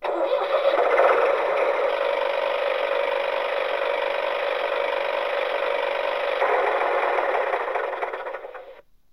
TRACTOR START
Old agriculture engine recoded with zoom
engine, tractor, sound-museum, machine, motor, old